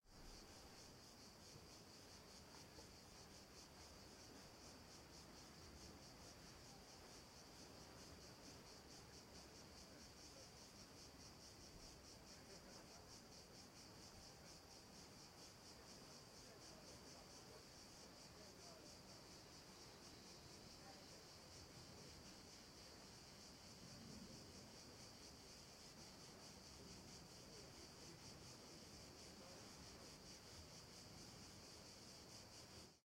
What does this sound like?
Ambience Cicadas Night 3
Cicadas, Ambience